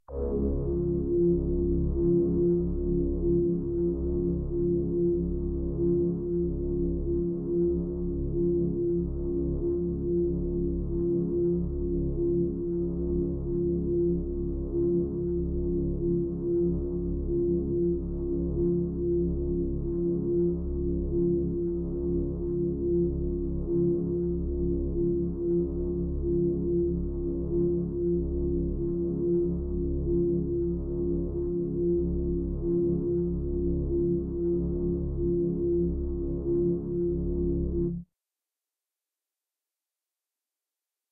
A drone synth with harmonics and slow modulation.
harmonics, pulse, trance